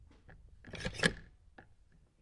the doors of my house